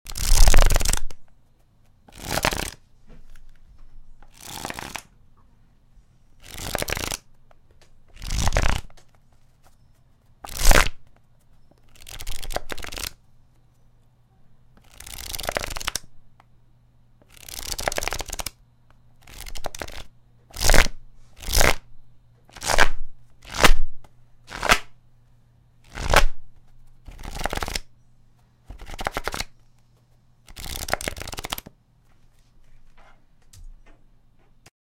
card flipping
Flipping a stack of 3" x 5" cards at various speeds. Recorded with a Neumann TLM 103.